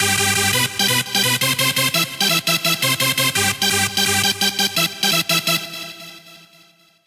Riff 8 170BPM
Oldskool style hardcore synth riff loop for use in hardcore dance music.
170bpm, 90s, bouncy, happy-hardcore, riff, synth